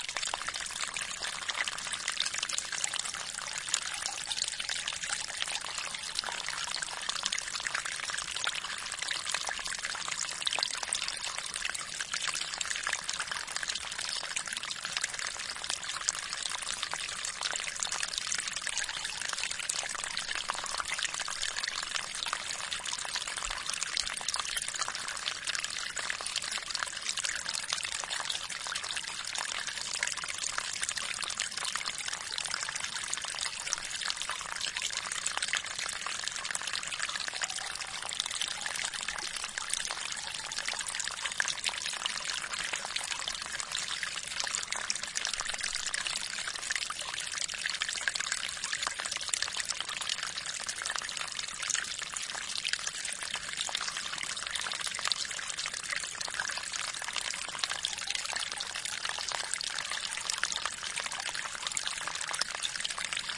a gentle water stream flows in the Chic-Choc Mountains, central Gaspé Peninsula in Quebec, Canada. Shure WL183 into Fel preamp and Edirol R09 recorder